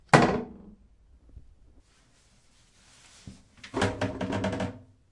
Dropping the toilet seat.
Recorded with Zoom H2. Edited with Audacity.